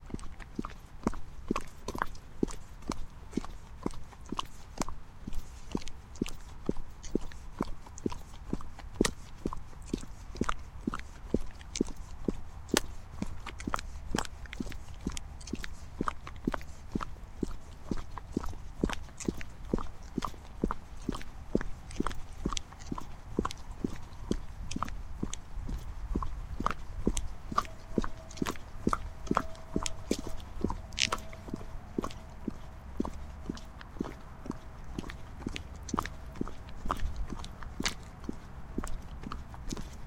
walking fast on the street
footsteps on the street. walking fast with some crackle sound of tiny stones